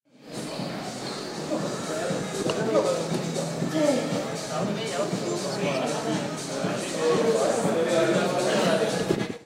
paisaje sonoro ambiente del gym
Sónido del ambiente del gimnasio, en la facultad de las Ciencias del deporte (Edificio D) UEM, Villaviciosa de Odón.